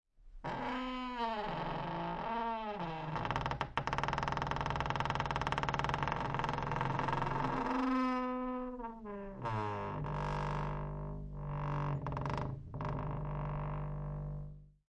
Squeaky Door - 115
Another Squeaky Door in our hands...
Door, Squeak, Squeaking, Squeaky, Wooden-Door